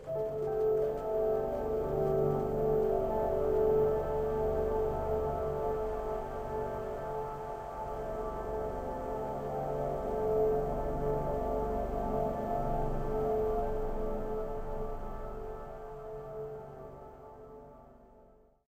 piano convolution
took a recording from a broken upright steinway piano; a recording of metal sounds and used the acoustic mirror convolution effect in sound forge to get a pretty ambient wash
wash, drone, ambient, convolution, atmospheric, piano